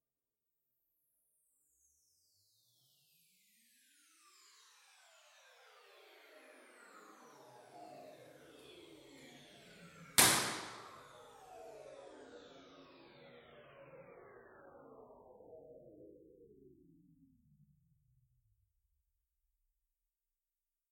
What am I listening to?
Impulsional Response 52.329 classroom
Impulsional response from the 52.329 classroom at Pompeu Fabra University, Barcelona. Recorded with Behringher ECM800, M-audio soundcard and the soundsystem from the classroom.